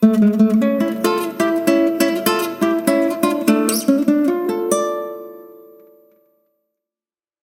Creole Guitar (Guitarra Criolla) in Dm
Creole music is the music of the coast of Peru, It is influenced by Spanish music. The Guitar and The Cajon Peruano are the pillars of this musical genre. The style is unique and rich in lyrics, rhythms and musical skill.
guitarra, music, peruana, criolla, musica, creole